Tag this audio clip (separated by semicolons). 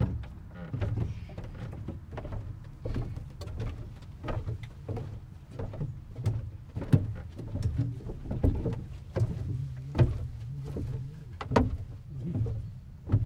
Field-Recording; Footsteps; Planks; Walking-Plank